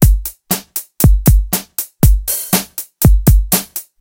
Rock drum loop played on Yamaha electronic drums, edited in audacity